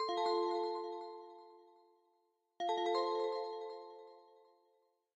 magic bonus game intro
Good for a bonus level, an intro or a win sound.
bonus, game, intro, level, magic, win